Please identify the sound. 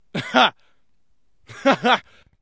Some loose chuckles
Recorded with Zoom H4n
male, laughing, chuckles, human, chuckling, man, laugh